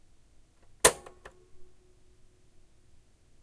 Stop on a vintage reel to reel recorder I found on a flea market
stop, tape, cassette, recorder, reel-to-reel